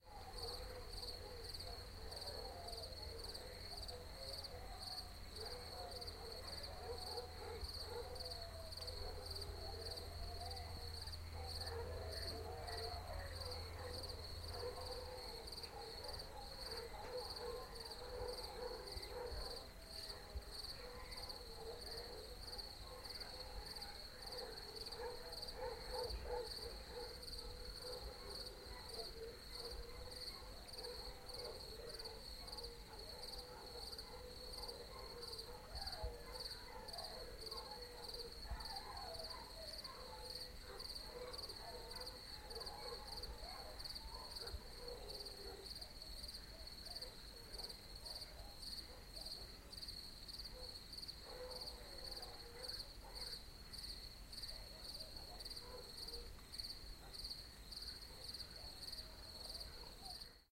lots of crickets and distant hunter-dogs, during a quiet night in France, Gers.Recorded with MS schoeps microphone through SQN4S mixer on a Fostex PD4. decoded in protools
night, crickets, ambiance